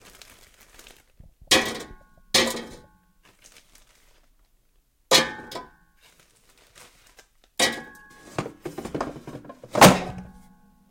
Putting food in an air fryer
Tossing some mozzarella sticks into an air fryer
cook, food, mozzarella